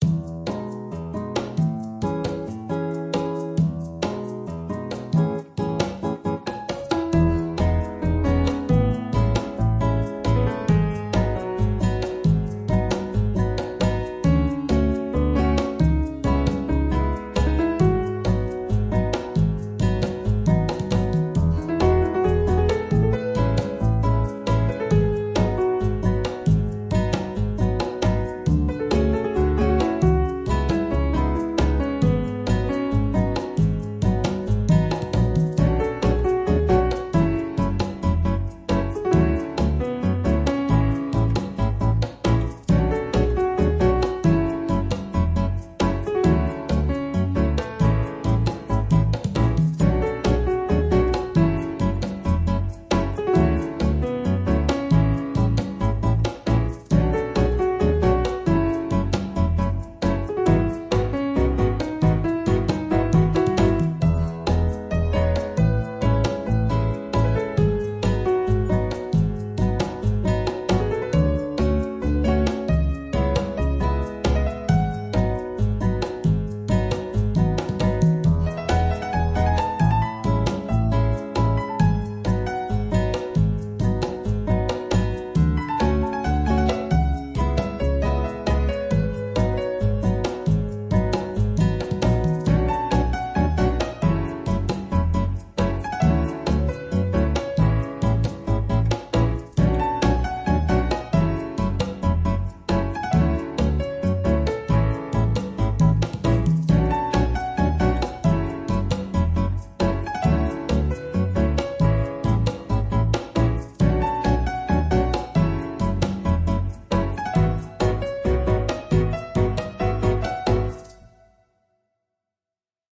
Relaxing Music
Track: 49
Title: Summer Time
Genre: Jazz
Sorry for the late submission, been busy for a while.
jazz, piano, music, bass, percussions, guitar, instrumental, background, congo, shaker